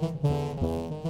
sax realtime edited with max/msp
edited, faint, loop, lower-register-tenorsax, repeat, sax, shy